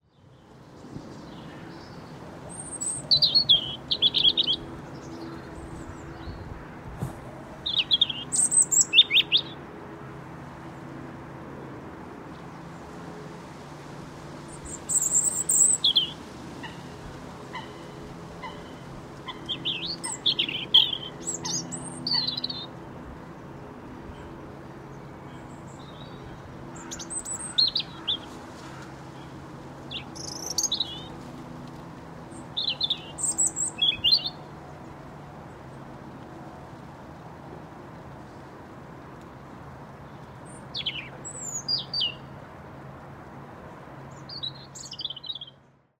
robin song
Robin in a tree and other birds
bird, field, nature, recording